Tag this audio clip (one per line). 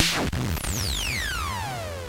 future-retro-xs
symetrix-501
tube
metasonix-f1
snare
tr-8